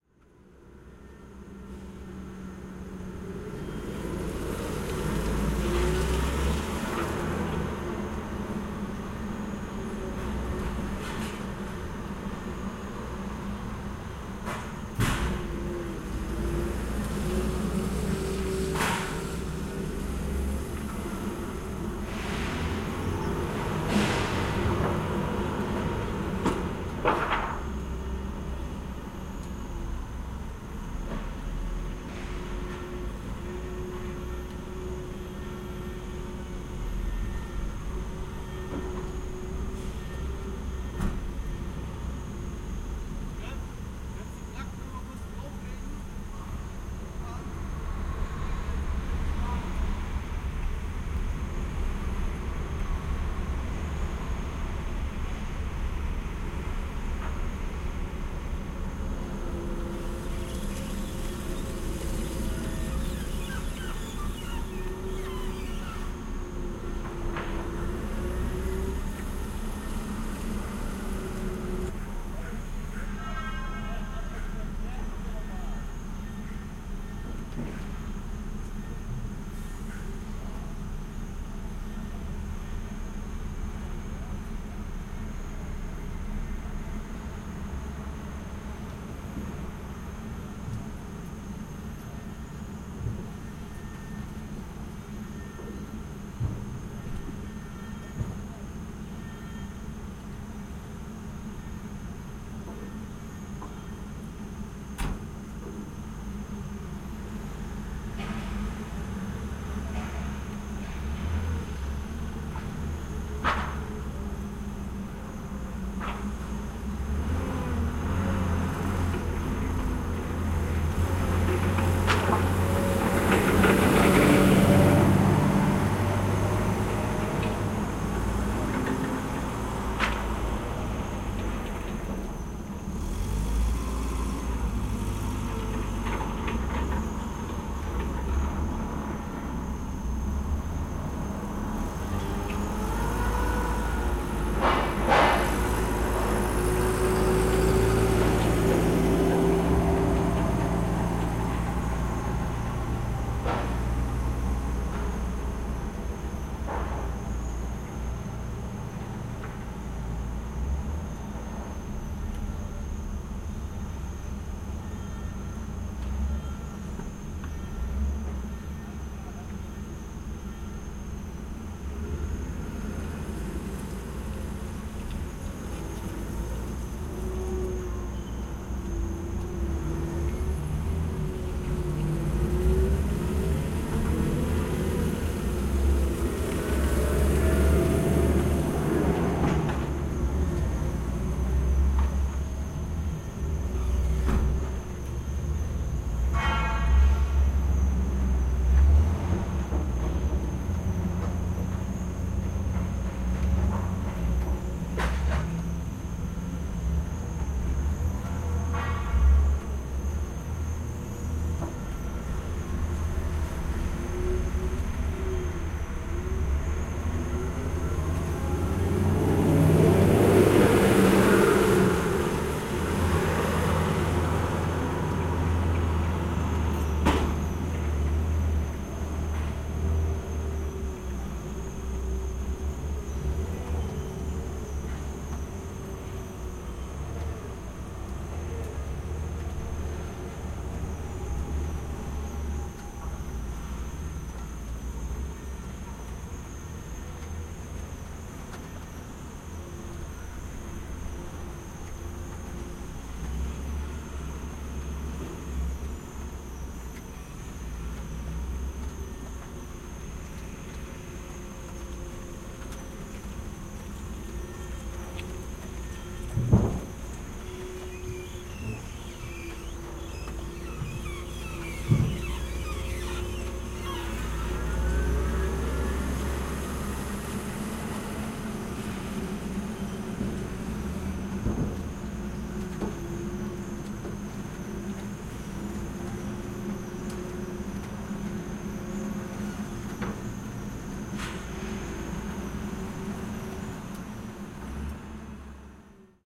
110816- unload steel in langenfeld
16.08.2011: seventeenth day of ethnographic research about truck drivers culture. Langenfeld in Germany. Steel company. Sounds of unloading process.
forklift, bang, steel, creaking, rain, voices, rattle, german, whirr, noise, engine, field-recording